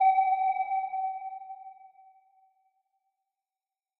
archi sonar 03
I created these pings to sound like a submarine's sonar using Surge (synthesizer) and RaySpace (reverb)
ping, pong, sonar, sub, submarine, synth, synthesized